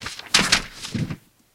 I dropped papers on the ground to simulate fliers being dropped by a winged creature.
Sound effect made by ~344forever of Project Phoenix Productions